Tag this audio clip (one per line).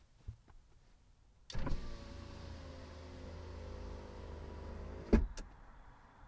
car-window
rolled-down